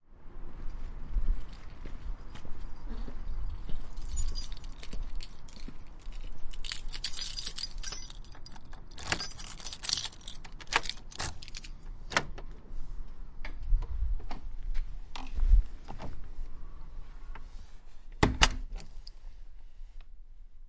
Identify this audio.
Door, unlocked
A recording of a front door being unlocked, opened and closed.